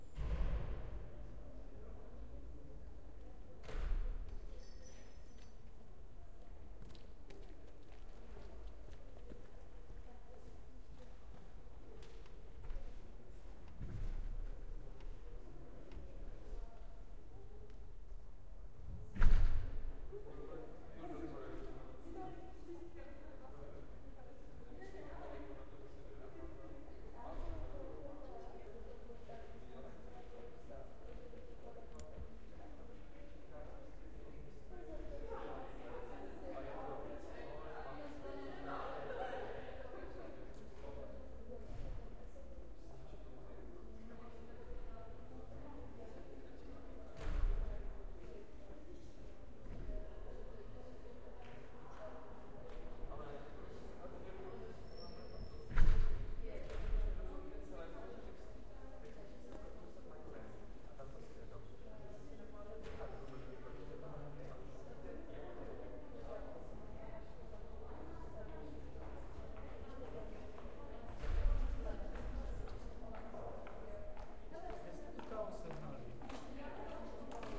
SE ATMO busy university square doors Olomuc
atmosphere, atmos, ambience